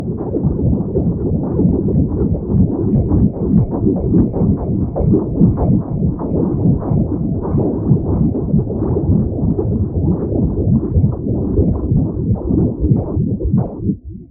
underwater engine
Accidentally created this while mucking about with sound FX, but listening to it, I thought maybe it could be used by someone as a the sound of a prop engine or a pump engine while underwater. There's a sort of metallic grinding in there too, I think.
engine, submersible, water